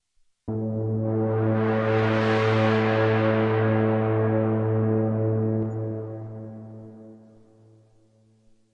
analogue synth sweep A3
sweeping, synthetisizer